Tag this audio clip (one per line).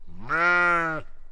Farm
Bleat